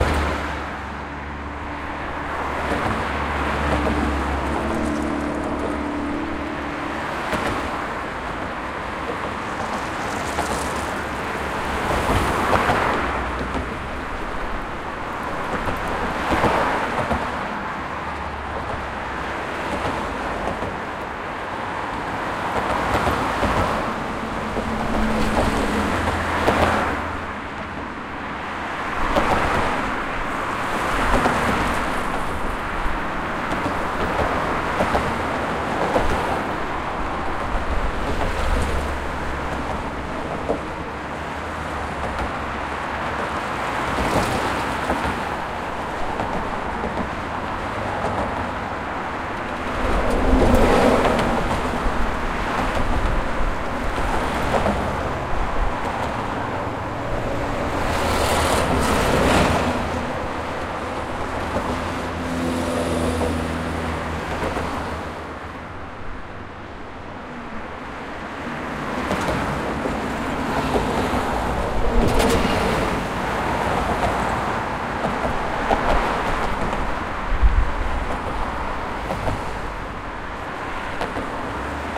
cars on Leningradskiy bridge1
Cars drive over the Leningradskiy bridge.
Recorded 2012-09-29 04:30 pm.